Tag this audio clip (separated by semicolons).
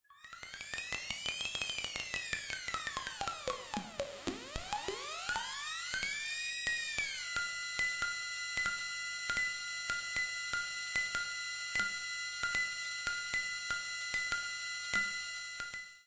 alesis; micron; synthesizer; blips